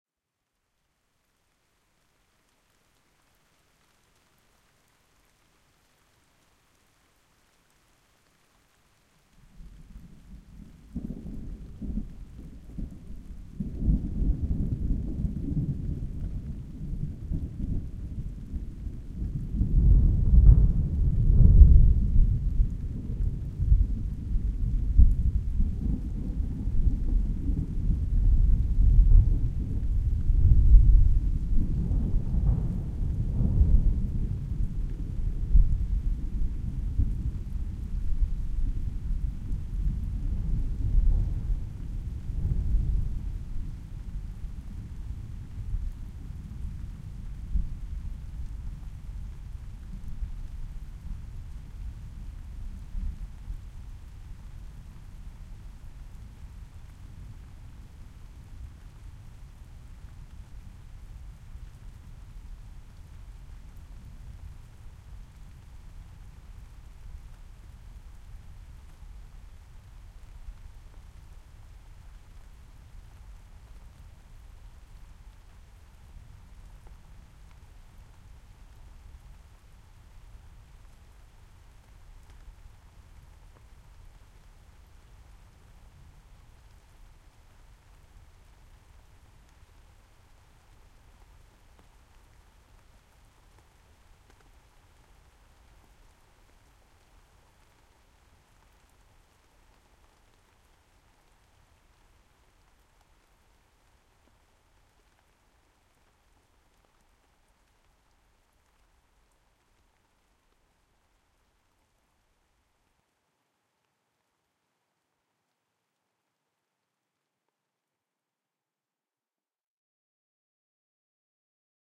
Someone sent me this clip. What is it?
4-channel record of a rolling thunder. The record is slow downed to 0,7-speed. The thunder has now very deep frequencies, which can shake your house, if you have the right equipment to play the file.
The 4 channels are recorded in IRT-cross technique (Microphone distance 25cm) so it is a 360° record.
At the end of the track the rain moves to the front channels. (Fade is starting at 1:20 min and is finished at 1:41min)
My other track can be used for "intro" followed by this track.
See/hear here for the intro option:
The spatial impression is best if headphones are used.
CH1 = FL
CH2 = FR
CH3 = RL
CH4 = RR
The Download-file is a PolyWAV.
If you need to split the file (e.g.to make a stereo file), you can use the easy to use
"Wave Agent Beta"(free)
from Sound Devices for example.
low-frequency, Rain, Surround, THUNDER, Nature, HQ, IRT-cross, Zoom-F8, rolling, 4-channel-audio, 360-degree, ATMO, 4-ch, Fieldrecording, NT1-A